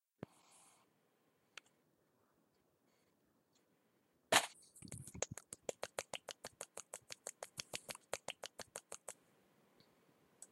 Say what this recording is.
I didn't have a flat tire sound for an episode of Dangerous Christian, so I had to make one. Hope this helps someone.